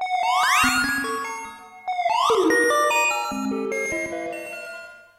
analog synthesizer synth electronic noise electro
analog, electro, electronic, noise, synth, synthesizer